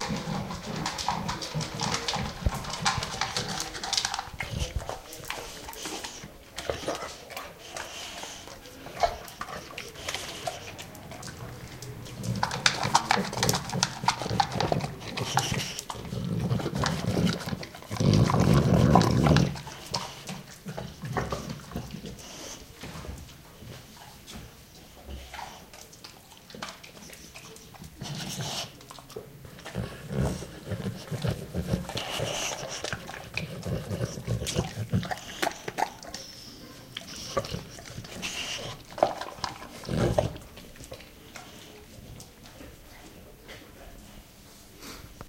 rocco mangia
my dog eating
dog, eat